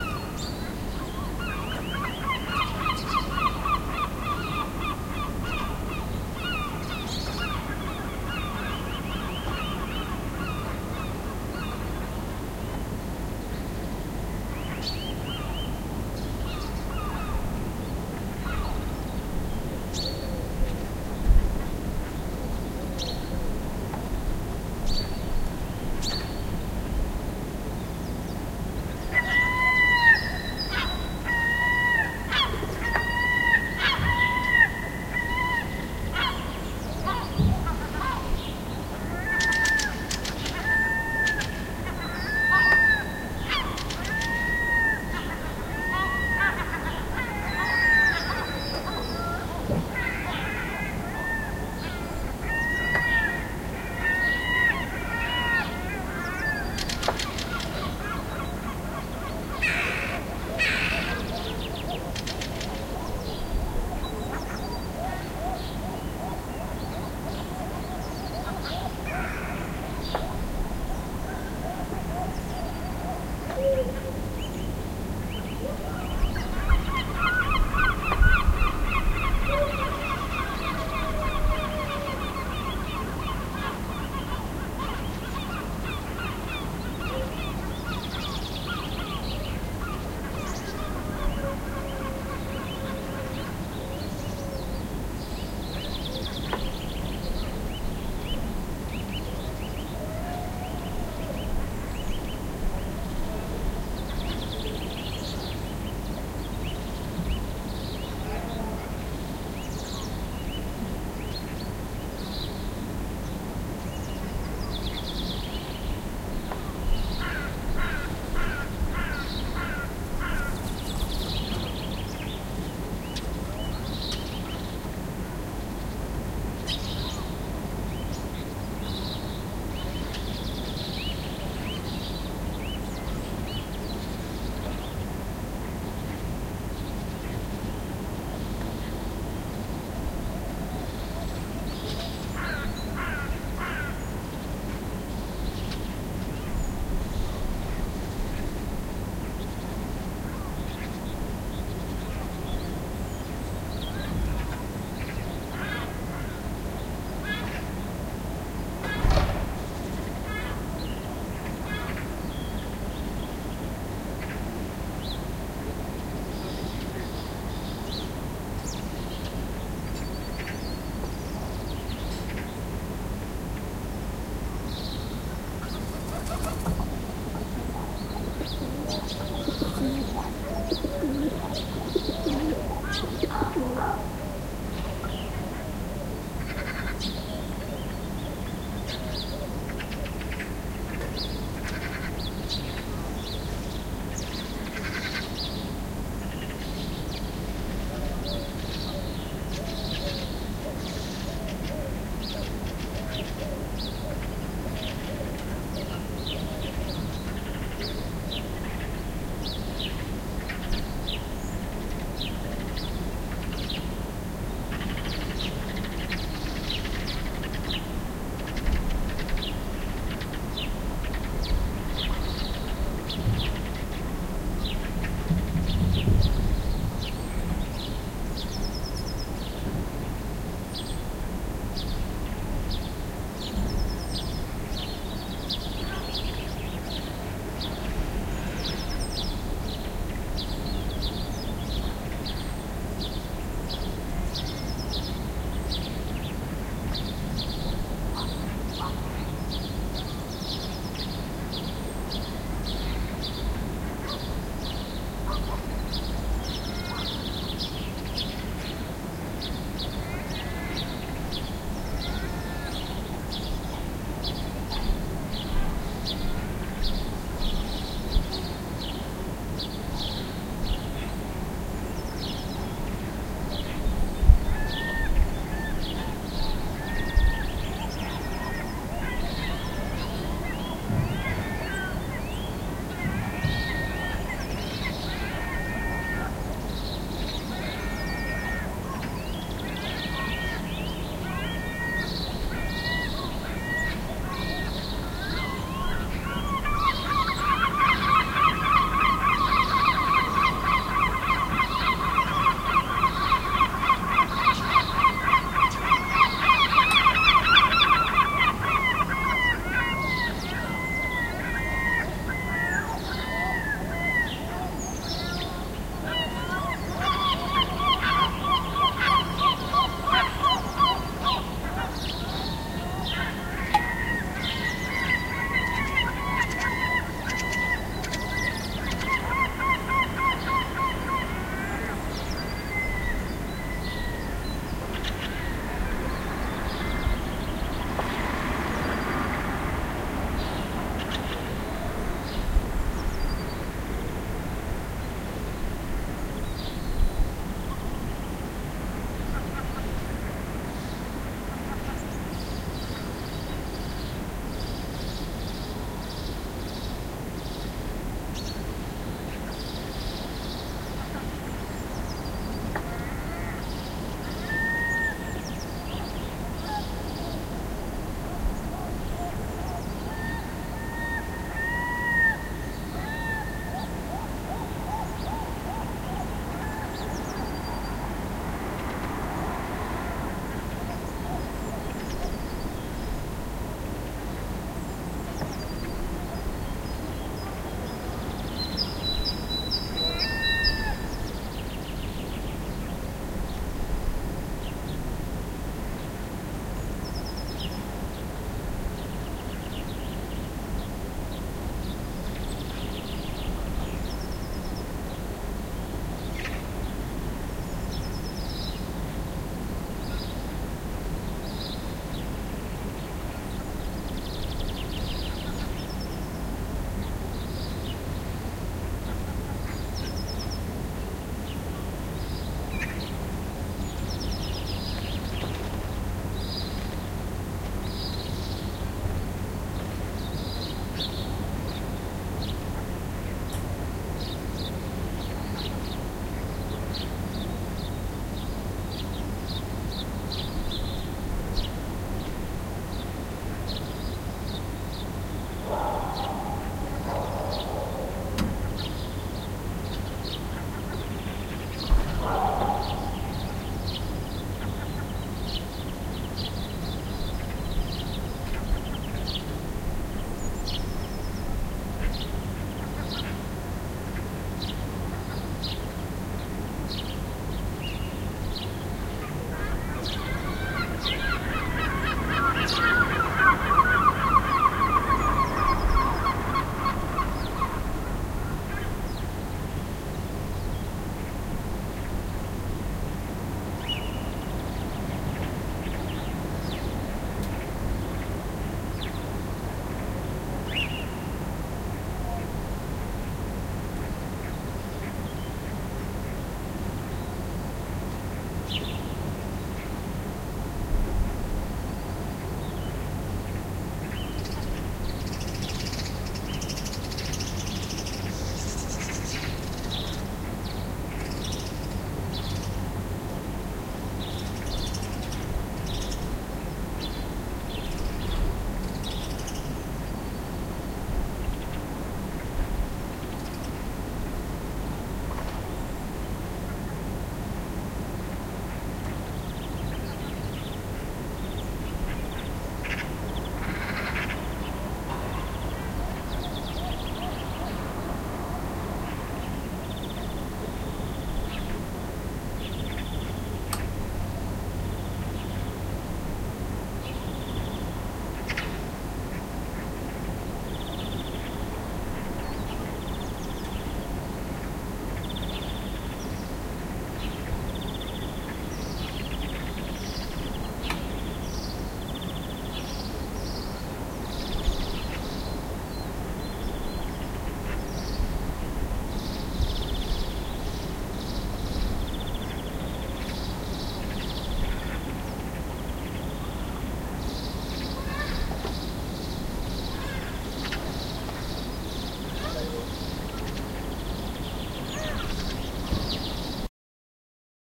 Swedish Spring Morning (2)
Recorded in Kålltorp, Göteborg. Spring 2014